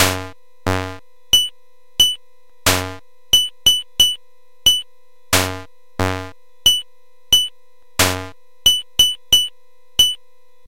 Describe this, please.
This came from the cheapest looking keyboard I've ever seen, yet it had really good features for sampling, plus a mike in that makes for some really, really, really cool distortion.